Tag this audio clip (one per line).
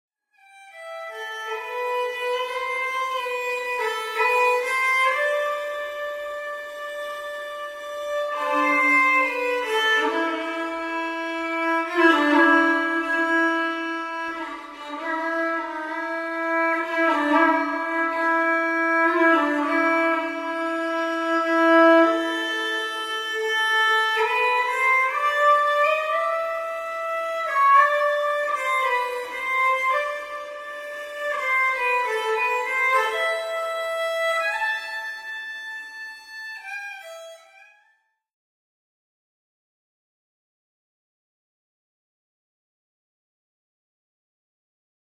sad
violin